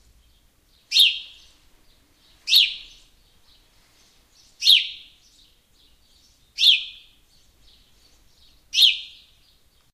a single sparrow inside a room, with birds outside in the background /gorrion solitario en el interior de una casa, se oyen otros pajaros fuera